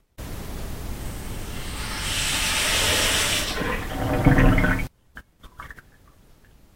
This sound is recorded by Philips GoGear Raga player.
On the start water flows into washbasin and later flows though canal.